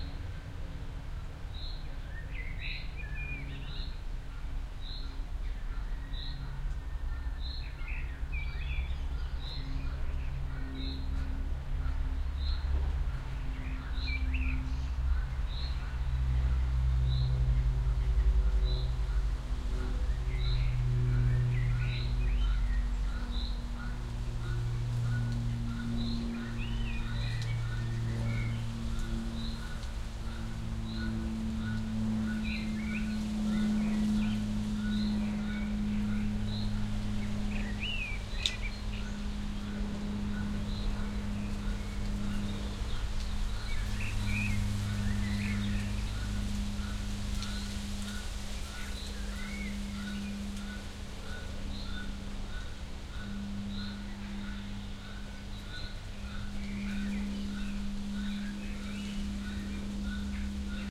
SuburbanSpringAfternoon-MS TestSetupPart2
Part 2 in a 6 part series testing different Mid-Side recording setups. All recordings in this series were done with a Sound Devices 302 field mixer to a Sound Devices 702 recorder. Mixer gain set at +60dB and fader level at +7.5dB across all mic configurations. Mixer - recorder line up was done at full scale. No low cut filtering was set on either device. Recordings matrixed to L-R stereo at the mixer stage. The differences between recordings are subtle and become more obvious through analyzers. Interesting things to look at are frequency spectrum, stereo correlation and peak and RMS levels. Recordings were done sequentially meaning one setup after the other. sample presented here were cut from the original recordings to get more or less equal soundscapes to make comparing easier. Recordings are presented here unmodified. Part 2: Mid-Side +Mid setup: Pearl MSH-10 single point MS microphone + low pass filtered DPA 4060 omni. Low pass filtering at 40hz was done before the mixer input.
field-recording, spring, birds